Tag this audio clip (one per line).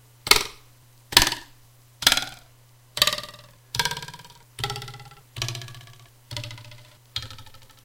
Weird
Noise
Recording